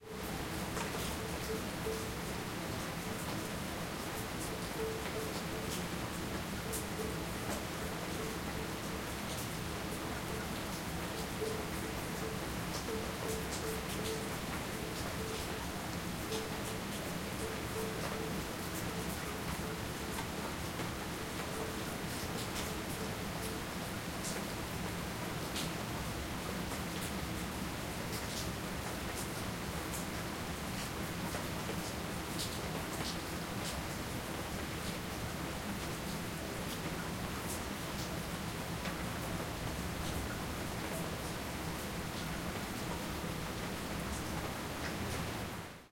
Medium rain dropping on metal surface
Light rain outside a house in a city. Water drops on a metal surface, a drain or rod.